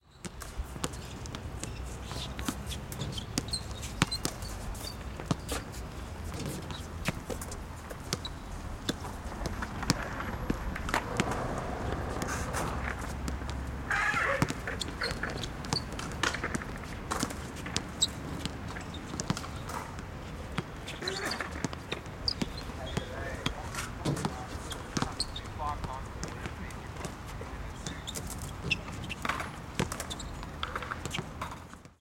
Skatepark & Basketball Area Soundscape
protools, atmosphere, field-recording, soundscape, Skatepark, basketball, ambience